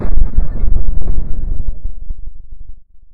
A large, simulated distant explosion
military, dynamite, distant-explode, explosion, explosive, distant-explosion, distant, battle, army, grenade, explode, war, bomb, weapon